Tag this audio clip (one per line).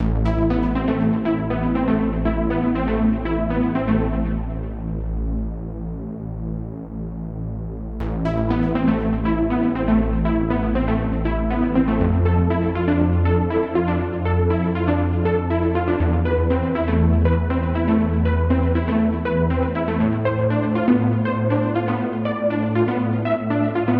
bass
electro
synth